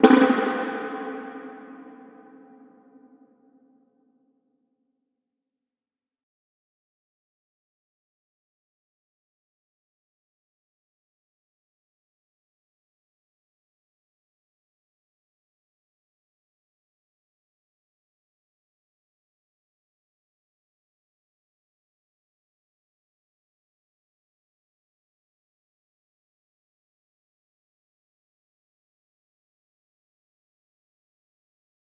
A recording of a re verb from a British spring put through a convolution re verb loaded with a tunnel impulse made in Bristol